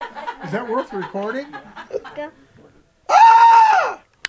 I recorded a family member doing a victim impersonation on new years day. It has a "wilhelm scream" quality to it.
aaahhh, impersonation, scream, uncle, wilhelm-like